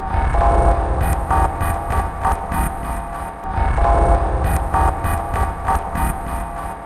One shots and stabs for techno experimental or electronic sounds. Some loops some sound shots.